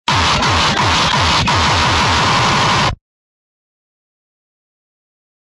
Do you like Noisy Stuff ( No Para Espanol)
Breaks
e, pink, glitchbreak, o, t, h, fuzzy, processed, deathcore, k, y, love, l